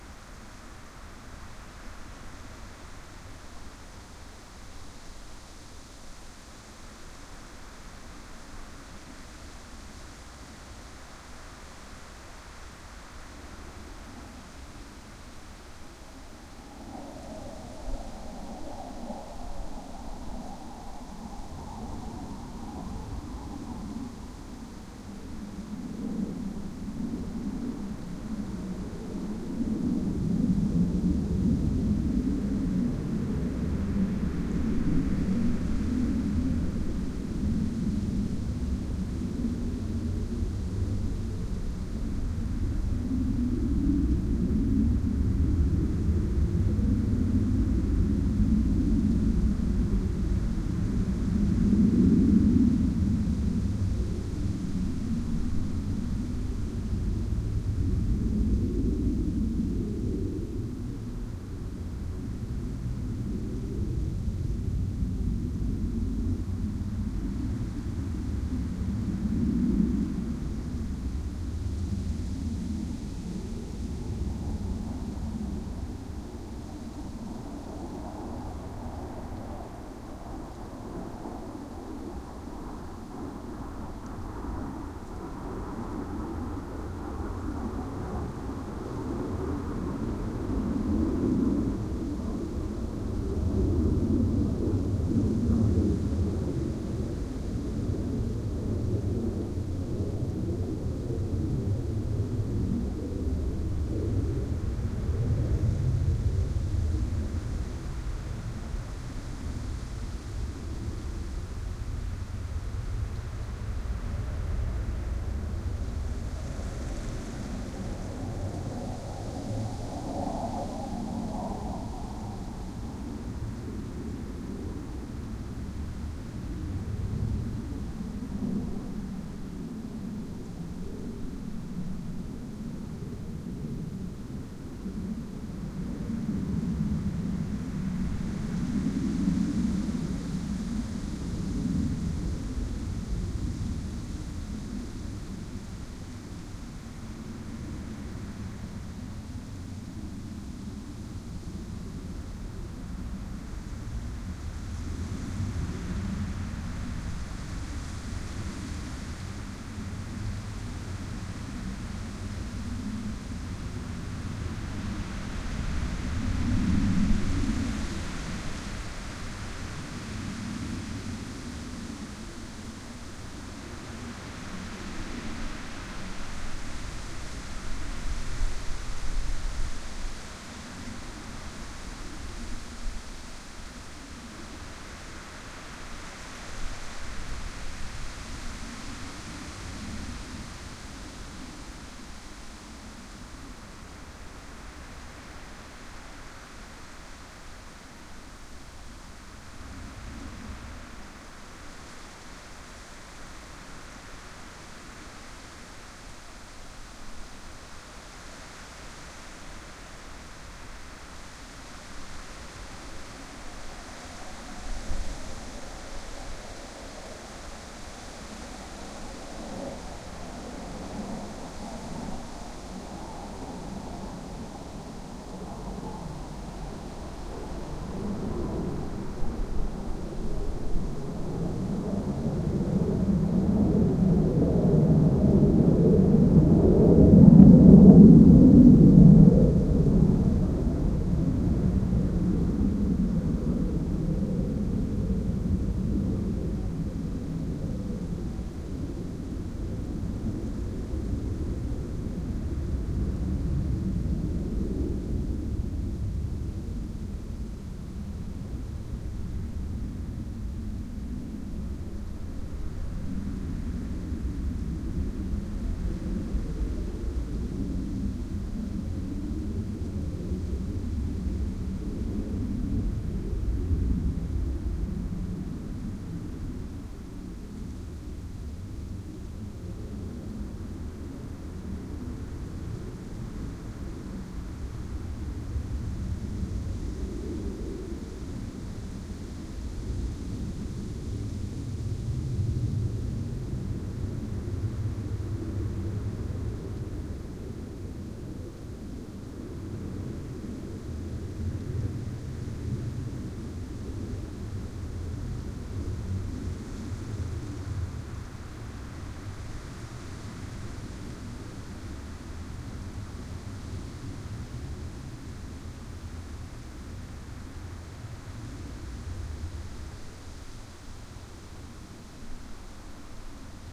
Fighter jet at night 09
File 9 / 10. F-16 Fighting Falcon flying low after midnight near Varde, in Denmark. F-16 pilots are practising dogfight and night flying all night through. This was cut out of a two hour long recording, there's a lot of wind at some points, but one definitely can hear the jets clearly. This lets you hear how it sounds when an F-16 passes by almost exactly over you. There's a good doppler effect and a nice depth to this recording.
Recorded with a TSM PR1 portable digital recorder, with external stereo microphones. Edited in Audacity 1.3.5-beta on ubuntu 8.04.2 linux.